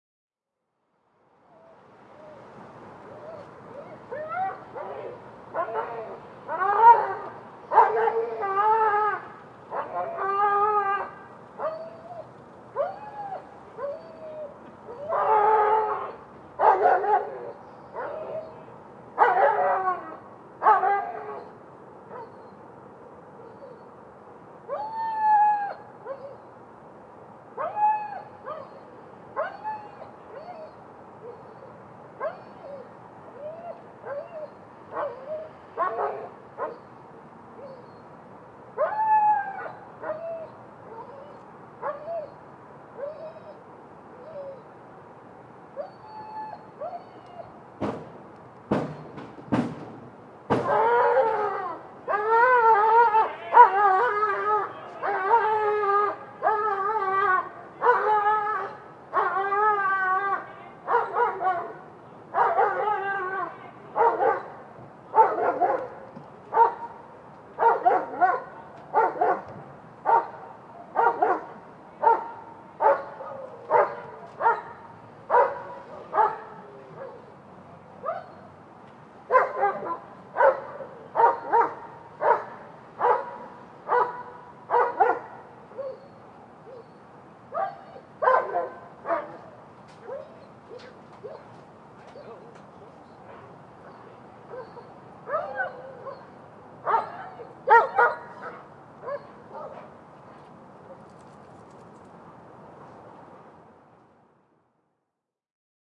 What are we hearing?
2012-04-30 evening drug bust
Tonight a large number of cops converged on my block to arrest a neighbor who seems to be a drug dealer or something. This recording is the moments leading to the point where the police broke down the door and arrested the suspect. There is a police dog who made a lot of noise and beyond that you can sort of hear the police yelling as they barge in to the place. The mic location was from my second floor window. The geotag is where the even took place, not the mic location. Recorded with a Rode NTG2 mic into a modified Marantz PMD661 and edited with Reason.